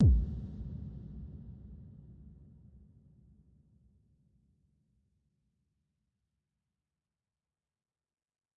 Decent crisp reverbed club kick 7 of 11
club, crisp, 7of11, kick, bassdrum, reverb